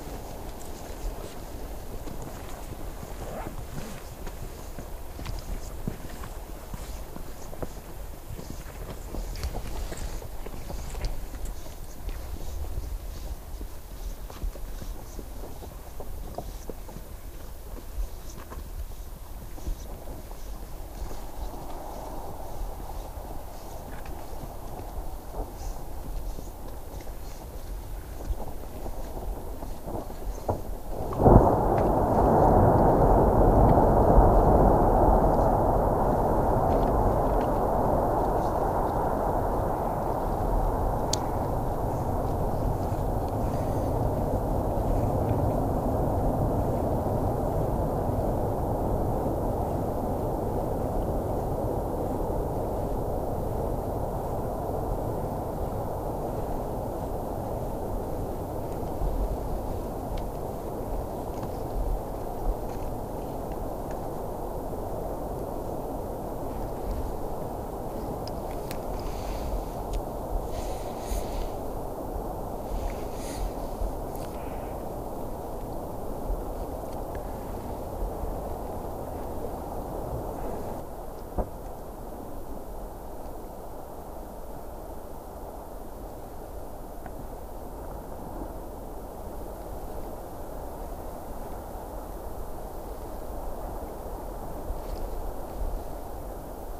east greenland vestfjord 20060912
Distant iceberg calves in Vest Fjord. Marantz PMD 671. Sennheiser 416.
icalving, ceberg